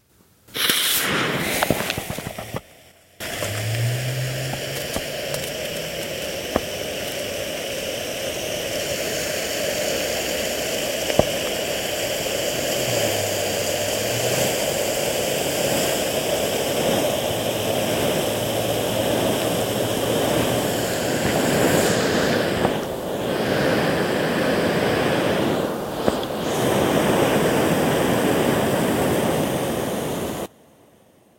13GBernardD dymovnice
armypyro, fire, fume, pyro